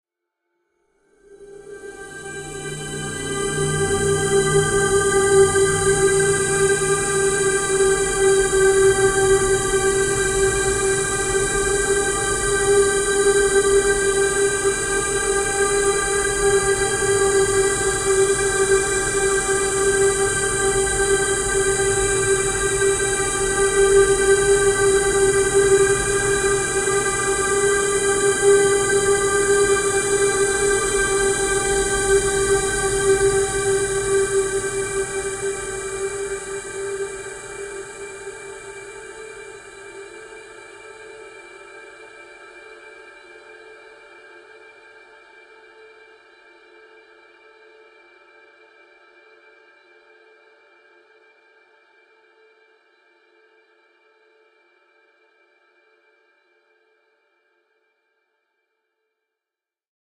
LAYERS 021 - N-Dimensional Parallel Space-80
LAYERS 021 - N-Dimensional Parallel Space is an extensive multisample packages where all the keys of the keyboard were sampled totalling 128 samples. Also normalisation was applied to each sample. I layered the following: a pad from NI Absynth, a high frequency resonance from NI FM8, a soundscape from NI Kontakt and a synth from Camel Alchemy. All sounds were self created and convoluted in several way (separately and mixed down). The result is a cinematic soundscape from out space. Very suitable for soundtracks or installations.
cinematic, divine, multisample, pad, soundscape, space